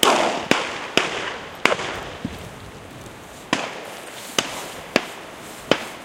20101031.shots.far.01
A series of distant shotgun shots. Audiotechnica BP4025 stereo mic, Shure FP24 preamp, Olympus LS10 recorder. Recorded near Osuna, S Spain
field-recording, hunting, shotgun